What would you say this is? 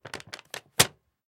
estirar folio de golpe
quickly, paper, strecht